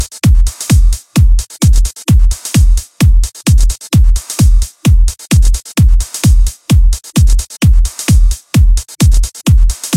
Troy's Hard Trance kick and hi hat
Hard Trance kick and hi hat beat i made. a hard kick and electric sounding hi hat. good for House, Techno, Trance.